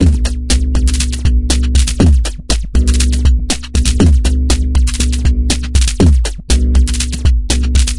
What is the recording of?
Aerobic Loop -13
A four bar four on the floor electronic drumloop at 120 BPM created with the Aerobic ensemble within Reaktor 5 from Native Instruments. Very danceable, very electro, a bit experimentel. Normalised and mastered using several plugins within Cubase SX.
rhythmic, drumloop, electronic, loop, 120bpm